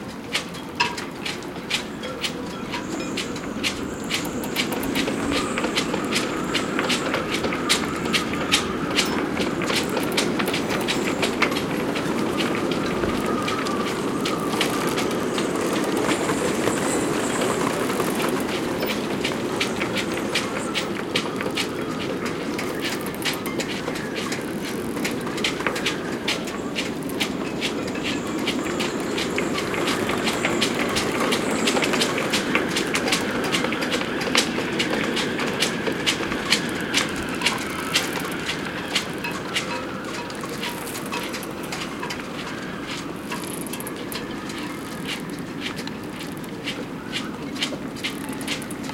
Marina in Kolding (Denmark) in wind
boats, denmark, flag, harbour, marina, poles, wind